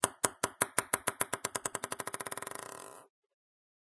Table tennis ball falling down onto a wooden table, then jumping until standstill, noise reduction. OKM binaurals, Marantz PMD 671.
ball, jump, ping-pong, table, table-tennis, tennis, wood